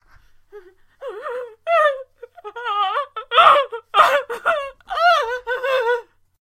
fear crying 3
Woman crying in fear, pain & horror
fear, horror, woman-crying